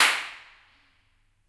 Clap at Two Church 2
Clapping in echoey spots to map the reverb. This means you can use it make your own convolution reverbs
spaces, room, convolution-reverb, impulse-response, bang, reflections, clap, reverb